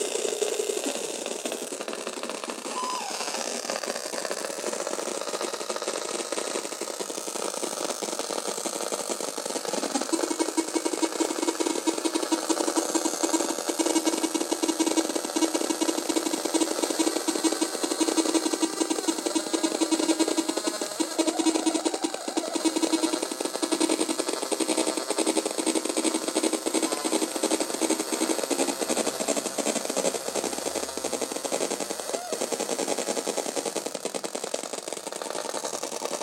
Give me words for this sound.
two noise generators 01
Two noise generators emitting noise. Sound recorded with the Mini Capsule Microphone attached to an iPhone.